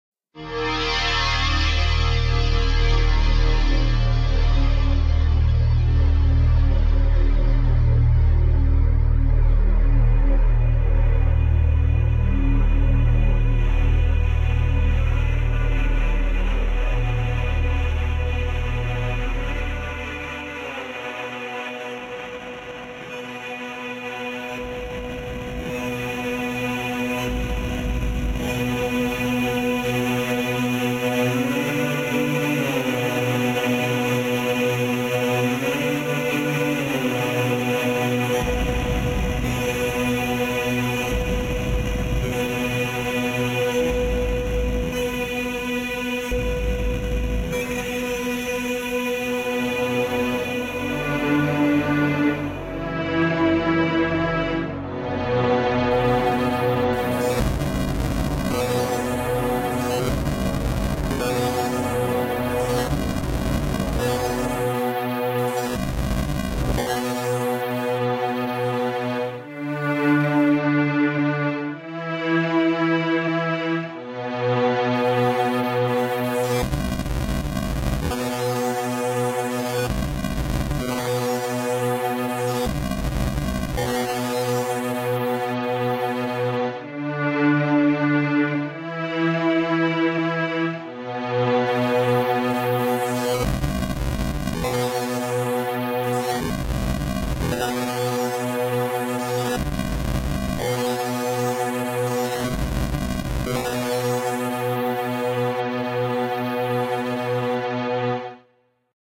the tongue of dog number 6
radio, space, wave, star, future, SUN, sounds